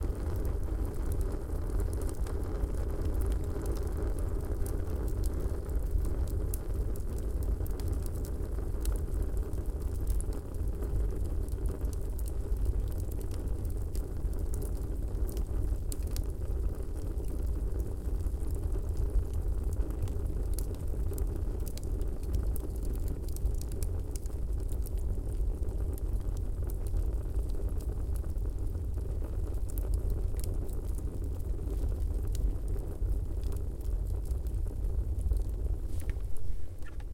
Stove burning v2